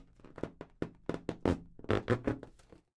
Sonido de un globo al frotarse
Sound of rubbing a baloon
chirping, cracking, oxidado, Rechinido, rusty, traquido